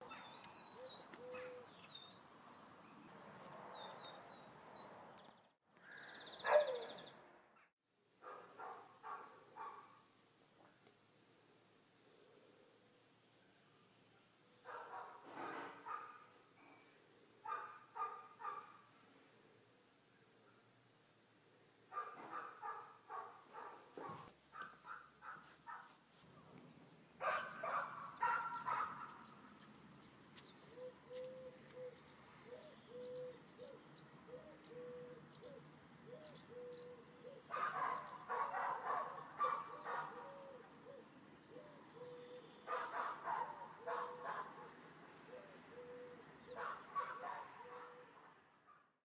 A dog barking and birds singing in the garden.
animal, animals, bark, barking, birds, dog, field-recording, nature, pet, pets